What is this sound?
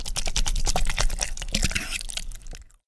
squishy oobleck goo
Made with corn starch and water which creates a non-Newtonian fluid known as an "oobleck".
offal, innards, goo, ooze, gore, sloppy, disgusting, gross, wet, goopy, squish, glop, slime, splat, slimy, goop, intestines, fat, squishy, slop